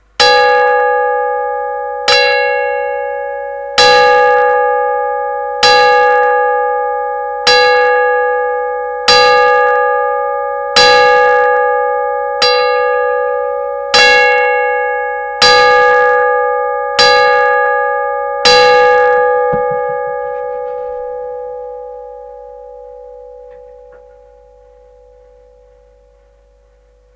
German clock standing on the floor making twelve beats.
tick-tock, time, dingdong, home, tic-tac, clock